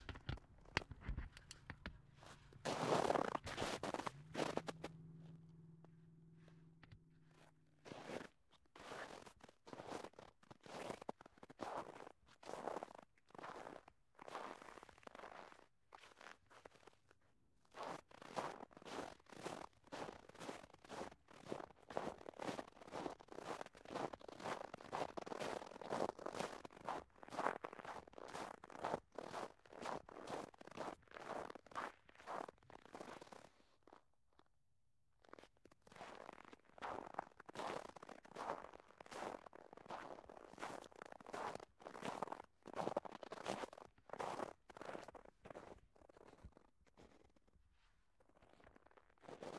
WALKING IN SNOW
Footsteps in crunchy snow at various rates. Sennheiser shotgun, Tascam d60.
feet, snow, walk